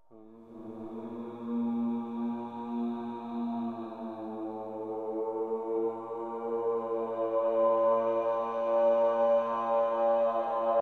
voice horn
stereo recording of my voice. recorded on a cheap mic. All amplification was carried out digitally including delay, re verb, compressor.
echo, horn, human, male, mystic, voice